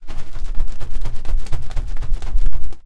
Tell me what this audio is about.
Making the fabric of the umbrella put some force against the air.